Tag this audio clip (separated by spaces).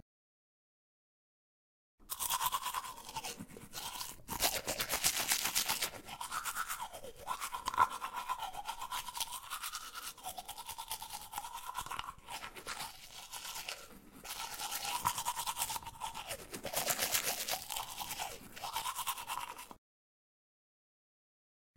bathroom cleaning CZ Czech Panska teeth